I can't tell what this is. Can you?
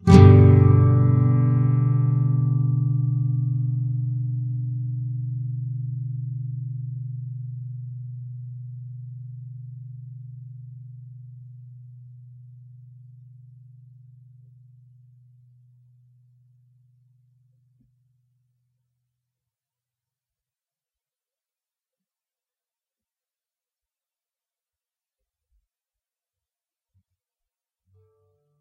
G full up
Standard open G Major chord. Up strum. If any of these samples have any errors or faults, please tell me.
clean, guitar